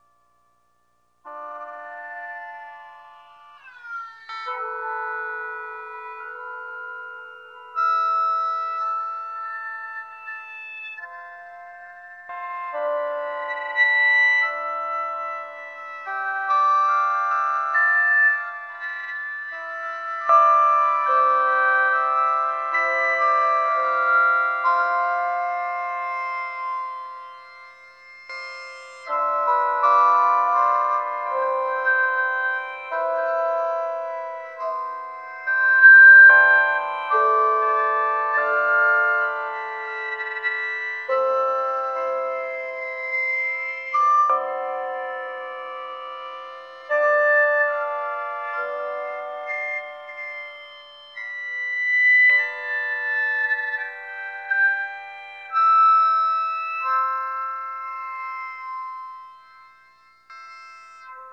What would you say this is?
Hey, Haggled a pawn shop owner into selling me an Alesis Micron for 125$ hehe, I have some sex appeal baby. *blush*
These some IDM samples I pulled off of it by playing with the synth setting, They have went through no mastering and are rather large files, So or that I am sorry, Thanks!